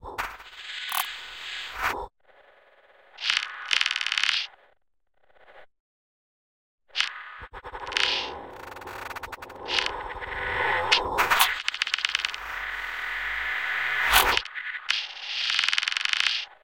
This is a processed waveform of a soundeffect. I made it with fruity loops granulizer. Enjoy :)